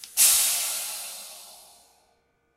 Air pressure release from a piece of mechanical equipment on an oil rig